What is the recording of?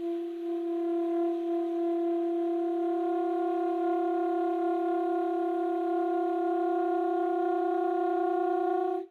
One of several multiphonic sounds from the alto sax of Howie Smith.